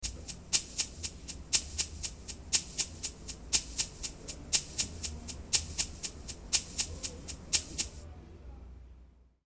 bumbling around with the KC2